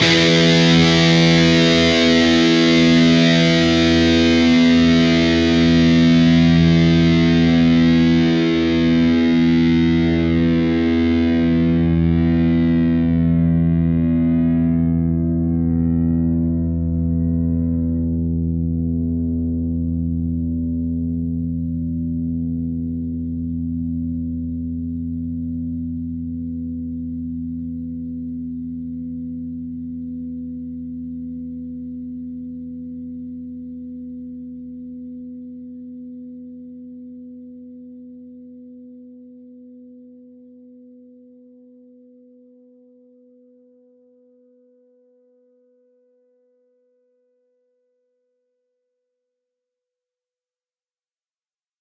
Dist Chr E oct up
E (4th) string open, A (5th) string 7th fret. Up strum.
rhythm, rhythm-guitar